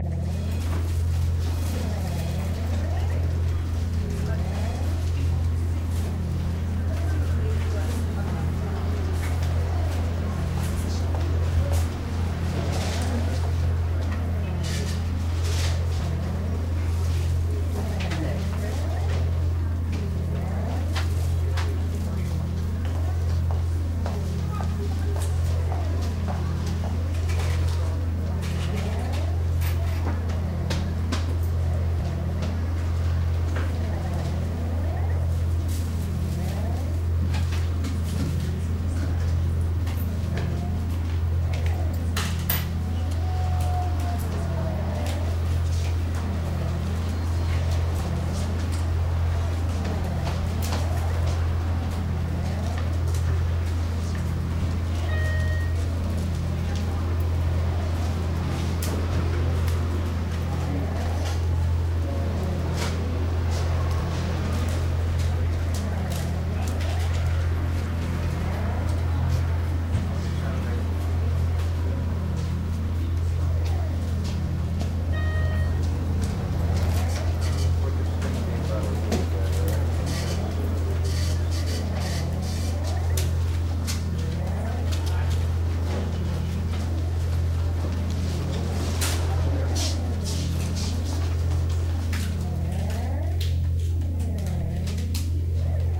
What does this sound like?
Sound mixed for the podcast Made Up Talk show.